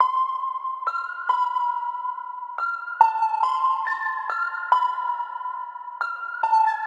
LCCPTL 140 Synth Am ThinPluck
140 bpm synth melody loop, suitable for uk drill & trap.
synth, synth-loop